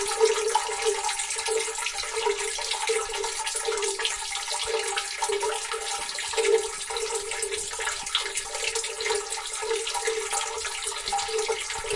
Water dripping with natural effect
Water source in the forest near Pratomagno - italy